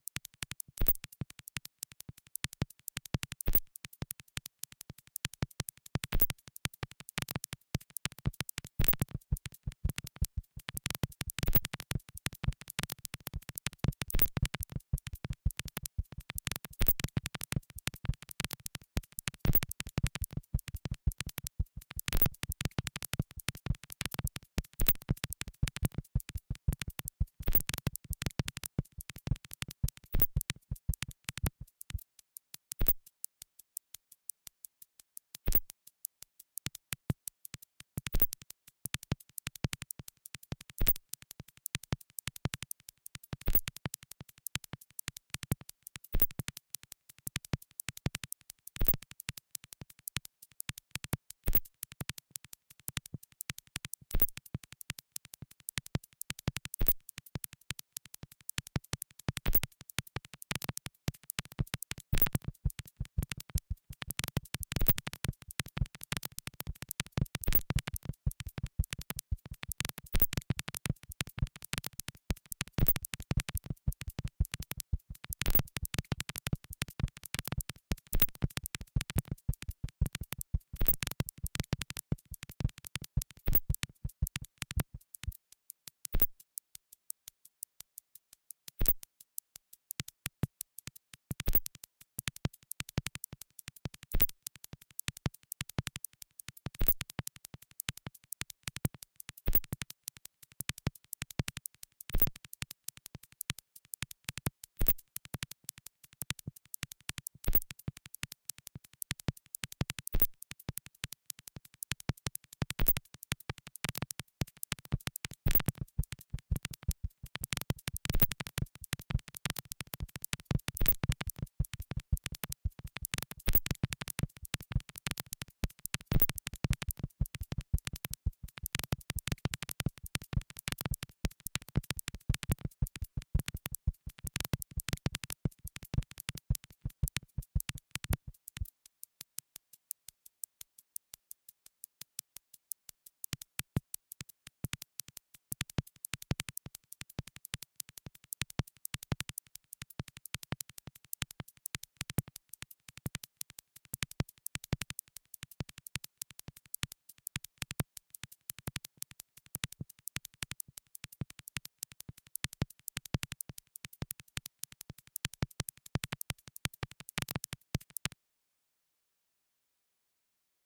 grain noise for live-set